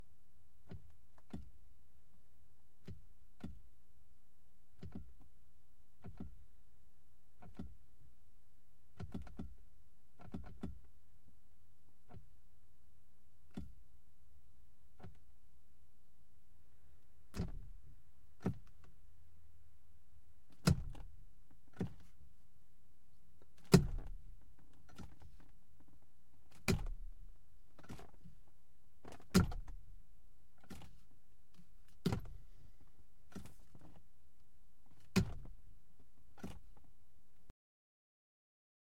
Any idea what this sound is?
The horn button on a Mercedes Benz, shot from the passenger seat with a Rode NT1a. The horn is first pressed lightly with the thumb while the hand holds the steering wheel. The horn is then slammed hard with the palm of the hand.